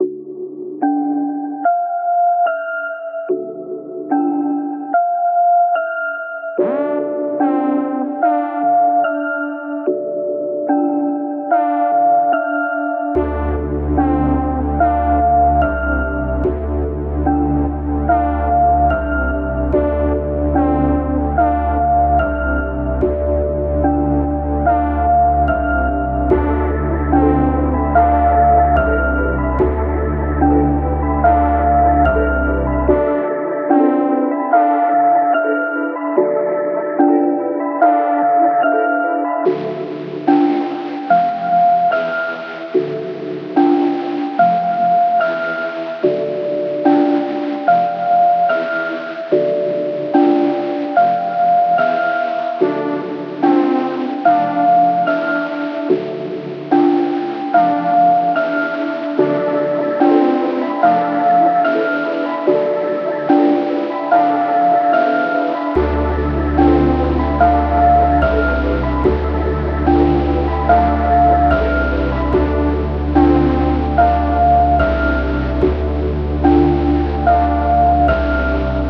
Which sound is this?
This is a long sample I made so producers can sample pieces of it and flip in their own way. Also it is 146bpm and is sequenced into individual 4 bar loops, so you could just place on a grid and chop every 4 bars to get all the individual loops.
electronic, hip-hop, loop, music-loop, rap, sample, trap, vinyl-sample